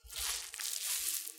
spons1zacht
Sound of a sponge. Record with a DAT machine